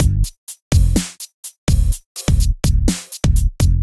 On Rd loop 1
Can be used with On Rd Loop 2 to create a simple but decent drum beat.